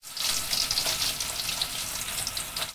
jx-fryingfish
Sound of frying a fish in a pan of oil. Taken with a mobile phone.